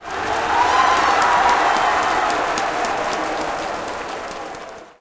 nagoya-baseballregion 22
Nagoya Dome 14.07.2013, baseball match Dragons vs Giants. Recorded with internal mics of a Sony PCM-M10
Ambient, Baseball, Crowd, Soundscape